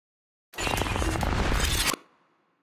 Rubber Crunch whoosh with end punch reverb
Whoosh that goes from rubber to crunchy metal with a sucking punch at the end. With reverb.